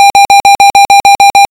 random alarm
Fun with tone generations in Audacity. Doesn't really signify much.
alarm, beep, bleep, bleeps, electronic, error, fault, rapid